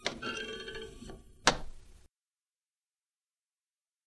Door handle spring
Digital recorder - processed using Audacity